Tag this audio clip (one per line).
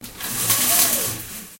blind
blinds
cut
sunlight
window